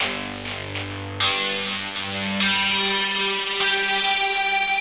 100 Dertill n Amp Synth 04
dirty, crushed, bit, digital, synth